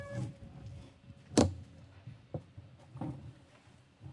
bicycle brake and shifter
brake, bicycle, shifter